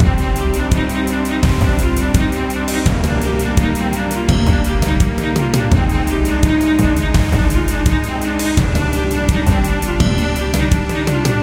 Epoch of War 8 by RAME - War Victory Fight Music Loop
Epoch of War is a war theme looping sound with triumphant and cinematic feel to it. There are a few variations, available as Epoch of War 1, Epoch of War 2, and so on, each with increasing intensity and feel to it.
I hope you enjoy this and find it useful.
army, battle, cinema, combat, energetic, epic, epoch, fight, fighting, film, loop, military, movie, trailer, triumph, triumphant, war